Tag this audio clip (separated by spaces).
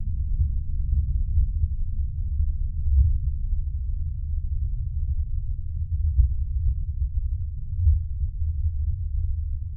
hum low bass underwater underwater-ambience deep-ocean